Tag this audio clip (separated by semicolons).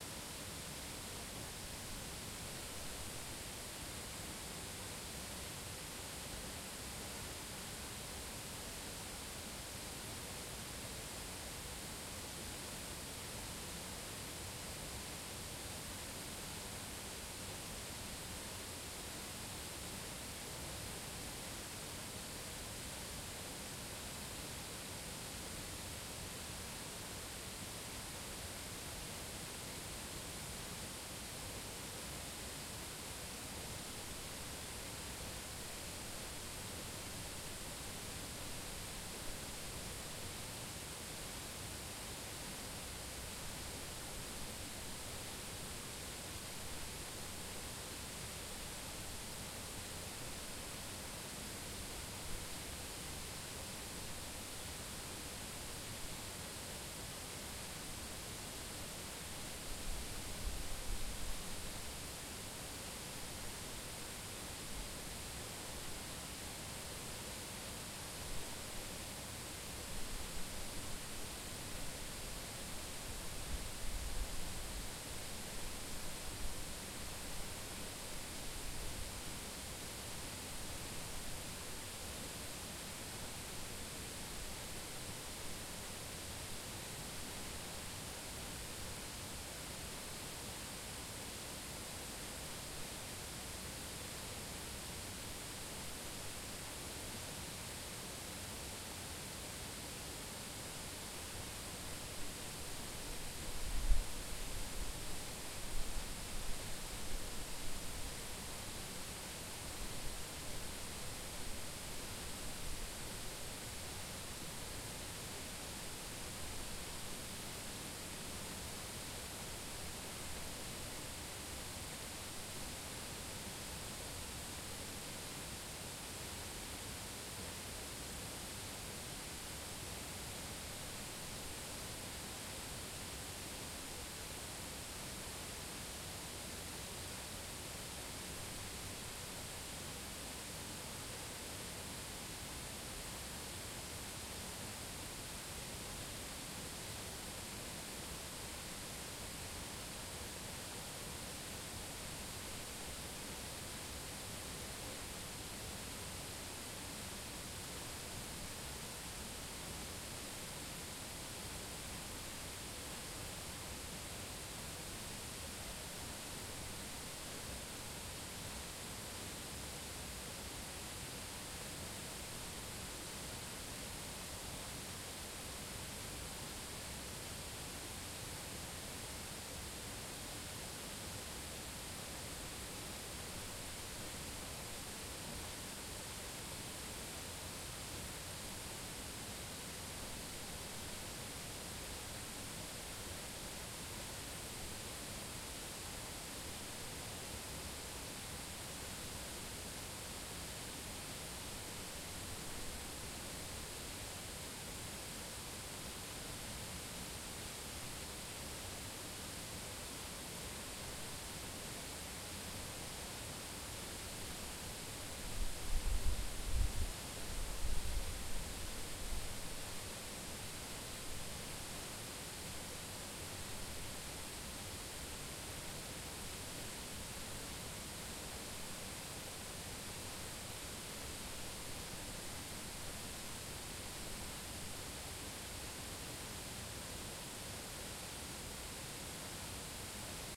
waterfalls; movie-scene; park; national